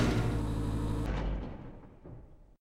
Heavy Mechancial Door Open
A sound I made for my project, I used the sound of a garage door, and sounds of a
conveyor belt and edit / modify them using Audacity
space, heavy, ship, door, open, mechanical